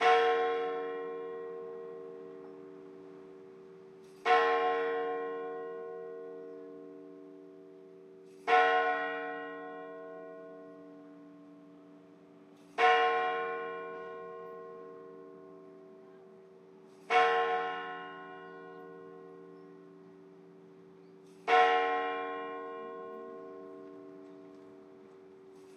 Church Bell 03
Antique, Bell, Plate, Ringing